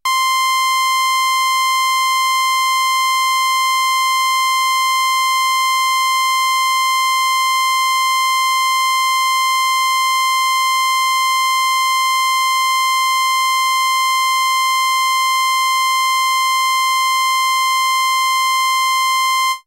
Mopho Dave Smith Instruments Basic Wave Sample - SAW C5